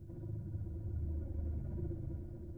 Very low pitched and subtle rumbling.
Horror, Psy, Dark, Free, Rumble, Cinematic, Ambient, Atmosphere, Film